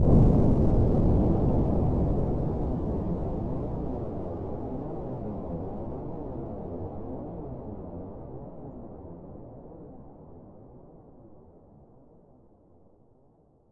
Torpedo launch underwater
This launch is a filtered version of Torpedo launch with some phaser added to simulate the water sounds.
blast, explosion, fire, launch, missile, projectile, rocket, torpedo